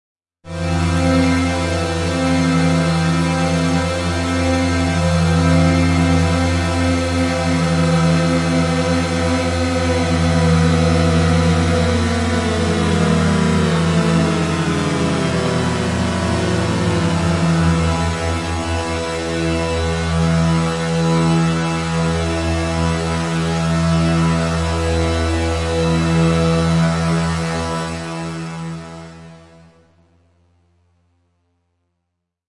A short transitional piece.
GEAR: Pro Tools 10.3.9, Korg Triton, Arp 2600 (vst)
CREATED ON: April 26, 2015